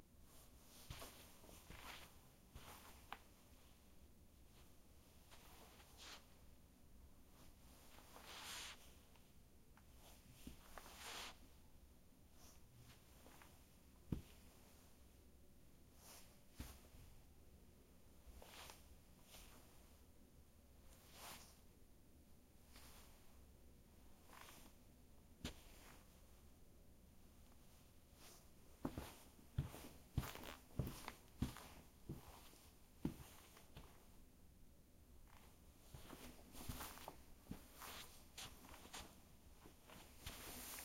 Carpet foot steps
Mostly sliding and shuffling foot steps, until the end when there is a strong walk out. Male, leather dress shoes.
walking, footsteps